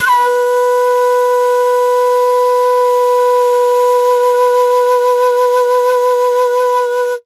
Flute Dizi C 071 B5
Flute Dizi C all notes + pitched semitones
C, Dizi, Flute